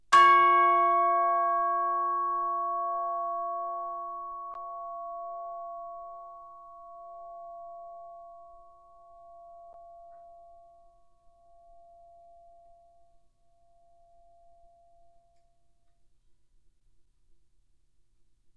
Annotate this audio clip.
Instrument: Orchestral Chimes/Tubular Bells, Chromatic- C3-F4
Note: C#, Octave 2
Volume: Forte (F)
RR Var: 1
Mic Setup: 6 SM-57's: 4 in Decca Tree (side-stereo pair-side), 2 close
bells, orchestra, chimes, music, decca-tree